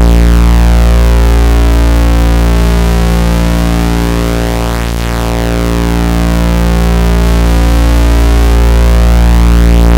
squaresweep3-labchirp
Same as the "Square Sweep 2", except that Operator 2 is set to "Saw Up".
Created using LabChirp, a program that simulates a 6-operator additive synthesis technology.
sweep, loop, robot, duty-sweep, noise, video-game, loopable, experiment, LabChirp, sweeping, videogame, ambient, sound-design, laboratory, 8-bit, video, duty, sci-fi, electronic, PWM, digital, game, modulation, ambeint, experimental, drone